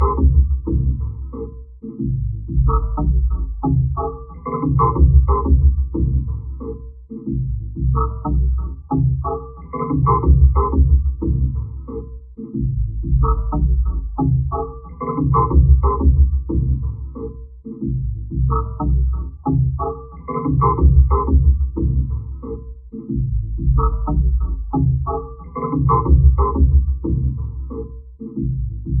drum loop sounds like it was swallowed by a vocoder